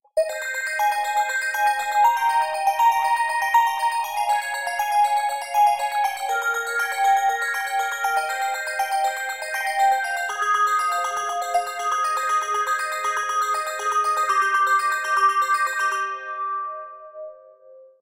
ARP B - var 1
ARPS B - I took a self created Bell sound from Native Instruments FM8 VSTi within Cubase 5, made a little arpeggio-like sound for it, and mangled the sound through the Quad Frohmage effect resulting in 8 different flavours (1 till 8). 8 bar loop with an added 9th bar for the tail at 4/4 120 BPM. Enjoy!
120bpm, arpeggio, bell, melodic, sequence